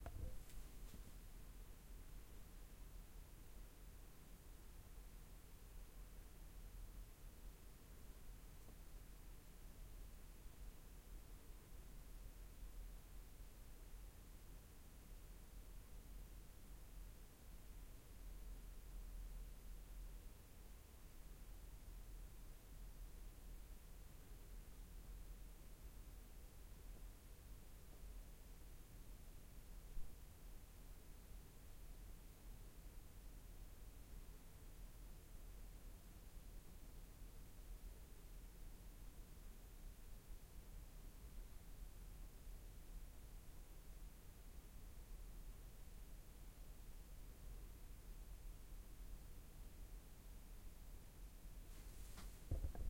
The sound of an empty room. Recorded with zoom h6n stereo
room, indoors, empty, room-noise, small-room, simple, silence